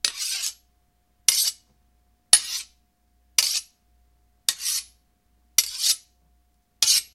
Metal Scraping Metal
Sound of two swords swiping against one another
metal, scraping, swords